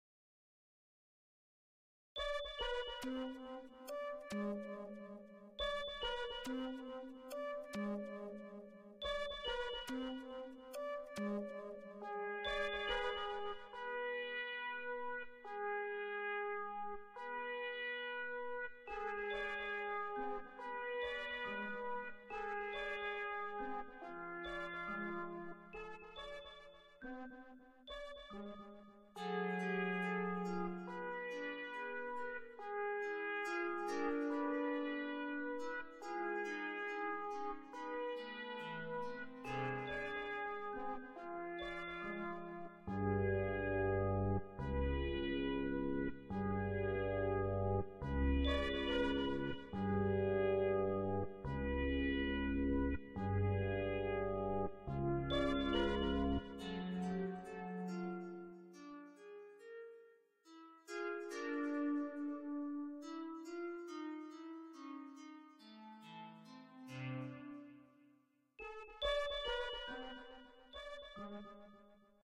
The last performance at the circus ended hours ago, all the performers are fast asleep resting up for the following days schedule...well except for one particular clown.
I created this with my Yamaha keyboard and a roland vs840 digital studio workstation back in the 90's.